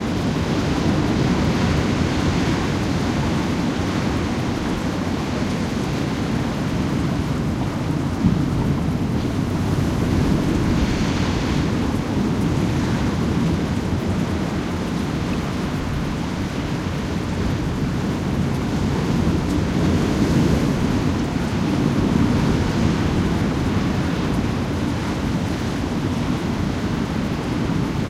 Big waves hitting rocky shore, after storm. Creek in background. Tascam DR-100